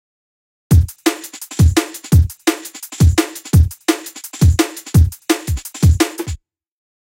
170 bpm dnb beat tuned E. Not very fat or bright in itself, but you can slice & process it further, 'cause i think it has funky roll ; ).
i've made & edited this break from various elementary components, tried to reach funky feel in ableton live. processed it with slight compression,tape saturation & some small focusrite reverb. Thanks for checkin' it out !
170BPM, ableton, beat, break, breakbeat, dnb, drum, drums, E, ethno, funky, groovy, jungle, loop, oldschool, roller, stepper, tribal, tuned